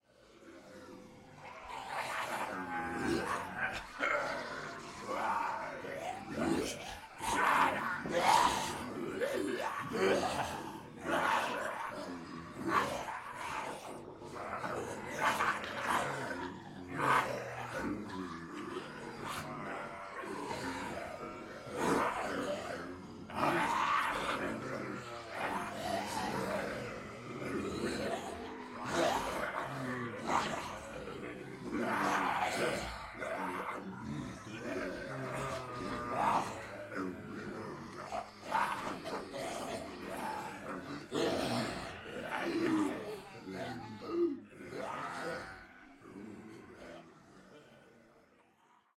Multiple people pretending to be zombies, uneffected.